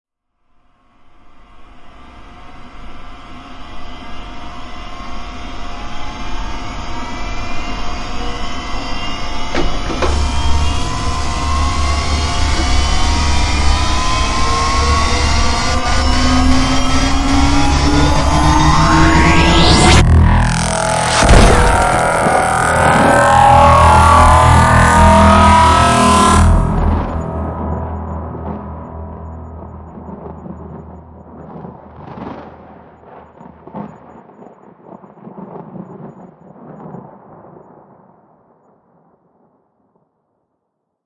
Laser of Doom
scifi, sci-fi, robot, film, alien, explosion, laser, starship, machine, charge, science-fiction, aliens, build-up, charge-up, charging, space, doom, war